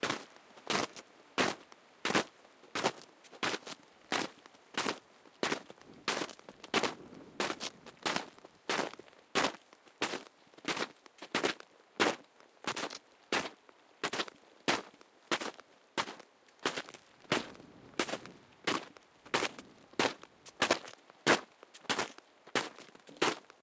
Footsteps Snow 02
Recorded footsteps in the snow using a Zoom H2N and X/Y pickup pattern.